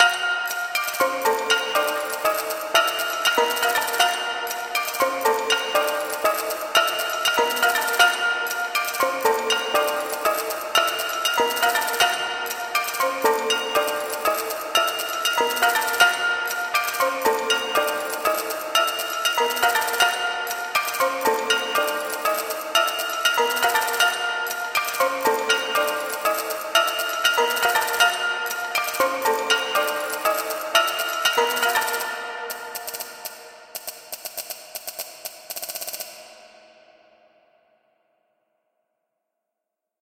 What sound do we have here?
Created a loop in Audacity by hitting an old Coca Cola collectors glass with a spoon in several locations, altering the pitch of these sounds, and applying them in a rhythmic fashion. Ended up with a kinda creepy march-like sound.